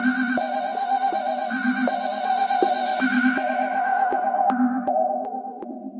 The Arrival
MFB Synth 2 with FX...
Synth, Time-machine, Space, Sci-Fi, Analogique, Futur, Porte, Processed, Alien, Scary, Sound-design, Curieux, Weird, Creepy, Future, Ambient, Synthetiseur, MFB, Science-Fiction, Mutant, OVNI, Ambiance, Strange, Analog, Etrange, Synthesizer, Extra-Terrestre, UFO, Echo